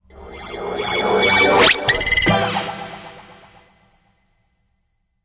HITS & DRONES 22
broadcasting, Sound